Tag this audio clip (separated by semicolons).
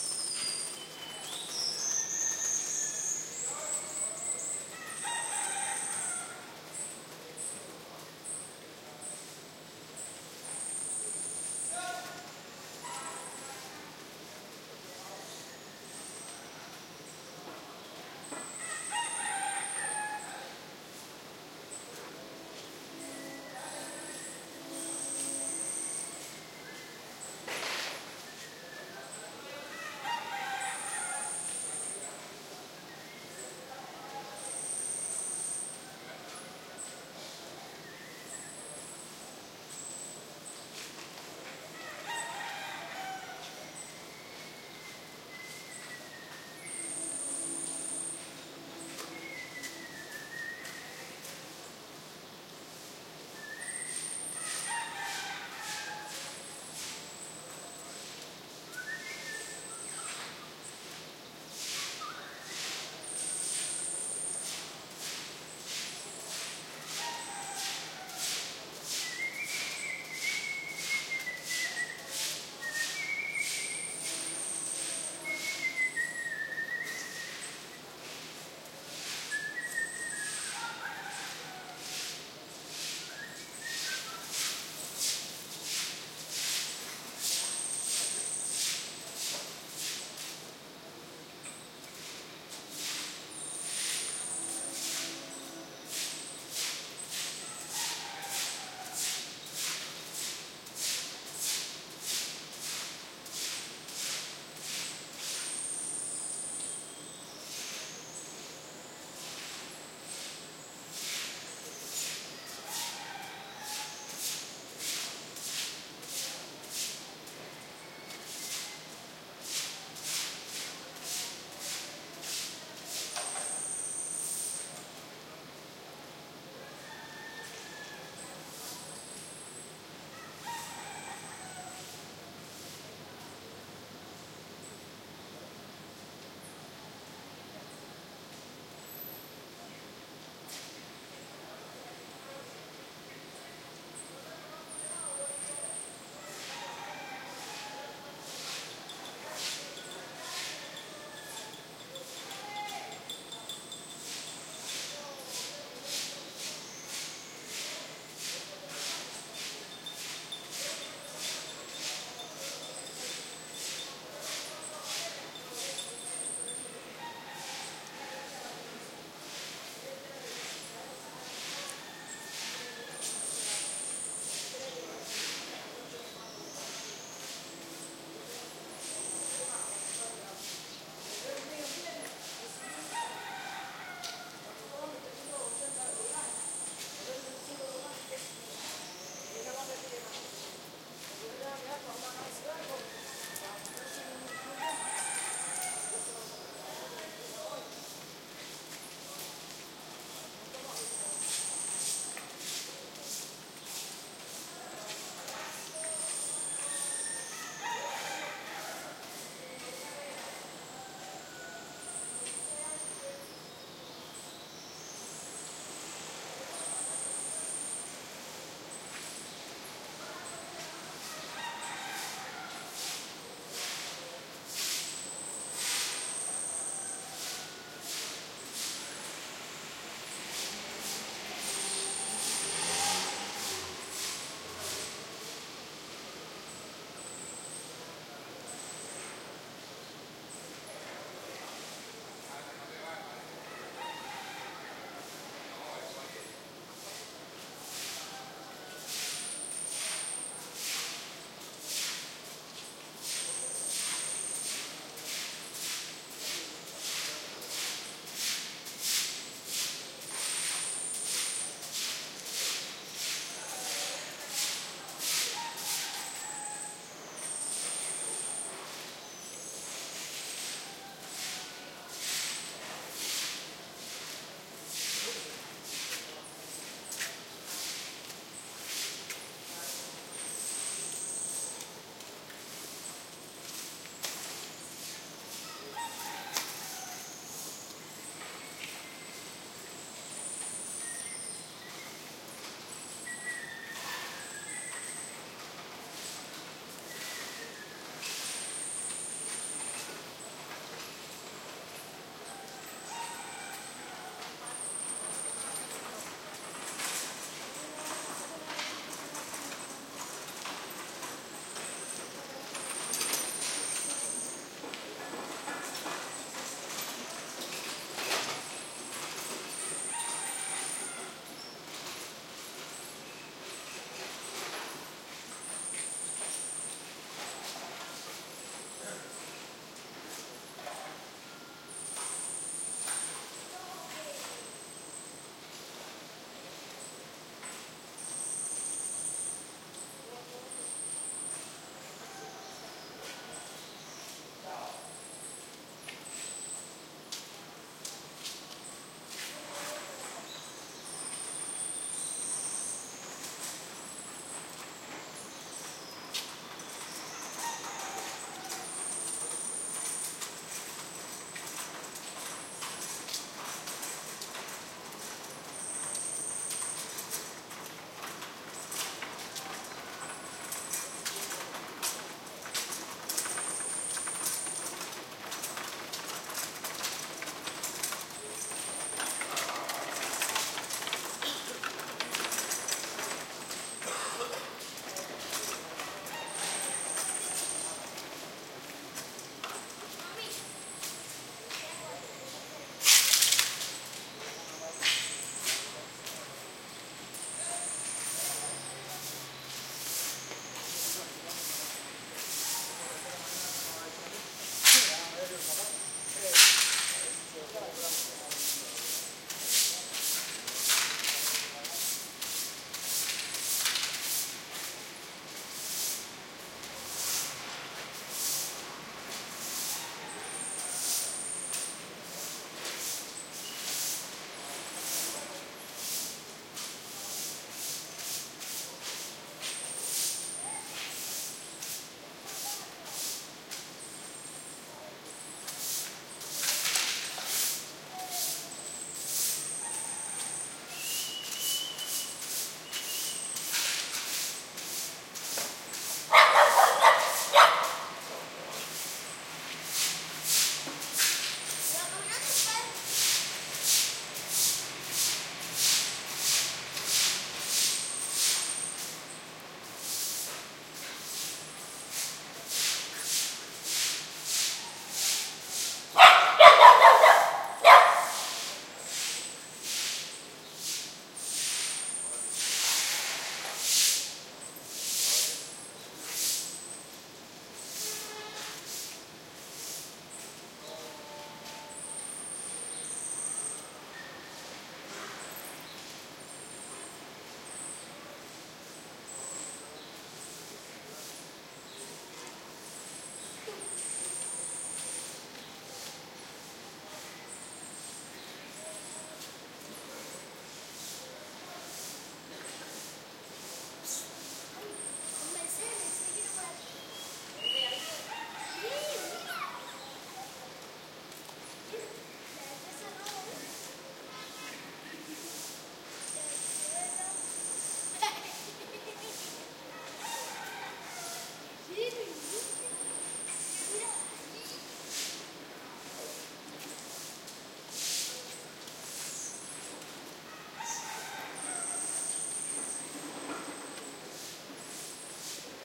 balcony; city; early; havana; morning; old; town